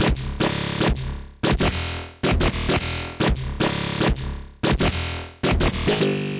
150 tonal dlay bass
heavy riddim bass
Wah, Riddim, Midbass, LFO, Wobble, Metallic, Bass, Heavy